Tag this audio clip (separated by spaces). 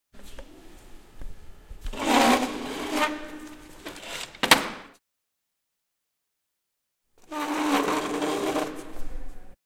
CZ Czech Panska